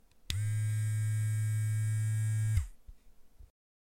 Shaver - shaver close
Electric shaving machine.
electric-razor, electric-shaver, engine, machine, mechanical, shaver, shaving-machine